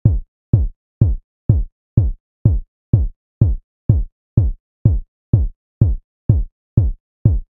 Drum Beat created & programed by me and slightly processed.Created with analog drum synthesizers with Buzz.